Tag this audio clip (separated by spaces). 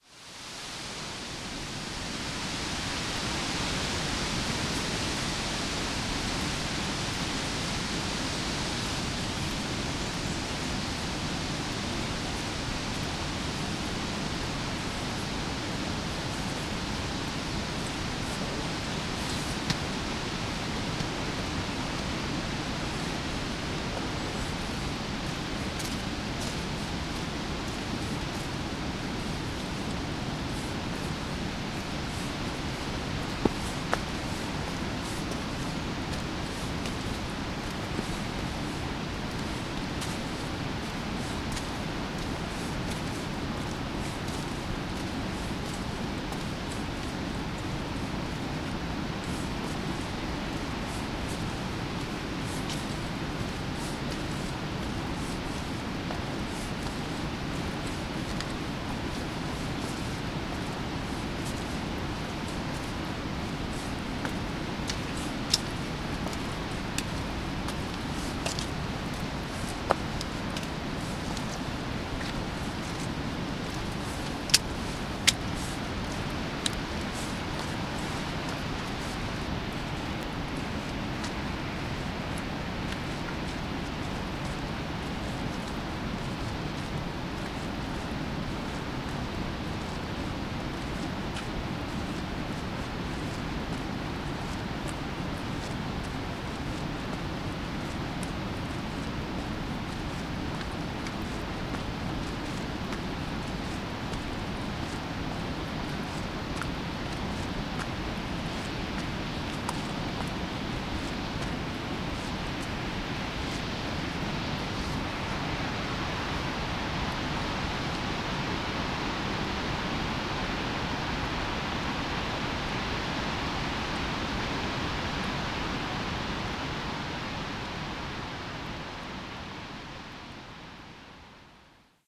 field-recording steps waterfall river